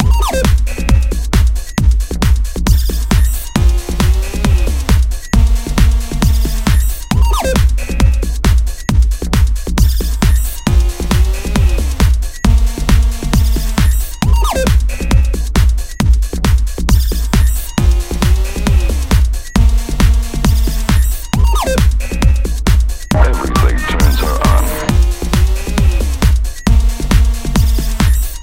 Turns Her On 135bpm 8 Bars
Beat Mix with sample. She digs it!
loops, Samples